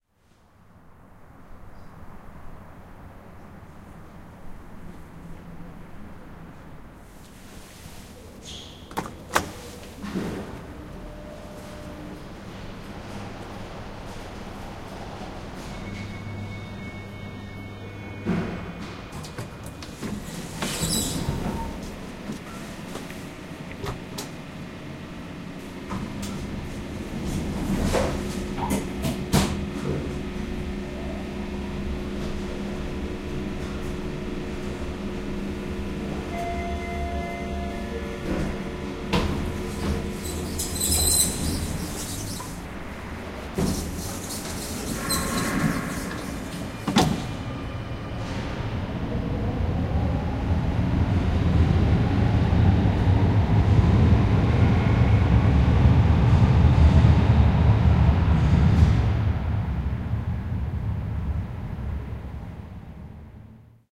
Elevator to subway (metro) station in Vienna, Austria
Inside an elevator at a subway (metro) station in Vienna, Austria. XY recording with Tascam DAT 1998, Vienna, Austria
Subway, Field-Recording, Transport, Metro, Elevator, City, Stereo, Public, Travel